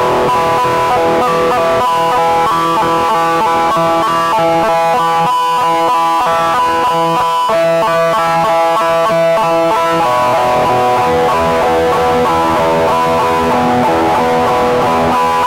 Sounds produced tapping with my finger nail on the strings of an electric guitar, with lots of distortion applied. Recording was done with an Edirol UA25 audio interface. Can be looped. This set of samples are tagged 'anger' because you can only produce this furious sound after sending a nearly new microphone by post to someone in France, then learning that the parcel was stolen somewhere, and that you've lost 200 Euros. As it happened to me!
(Ok, I'll write it in Spanish for the sake of Google: Esta serie de sonidos llevan la etiqueta 'ira' porque uno los produce cuando mandas un microfono por correo a Francia, roban el paquete por el camino y te das cuenta de que Correos no indemniza por el robo y has perdido 200 Euros. Como me ha pasado a mi)
distortion, anger